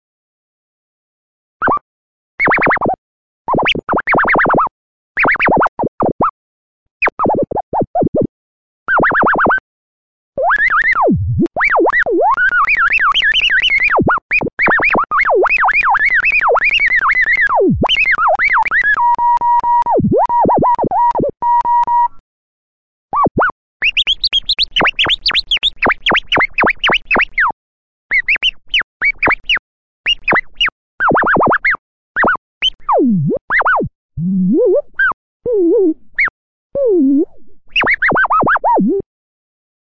made from beeps seconds of my skill
beep, virtual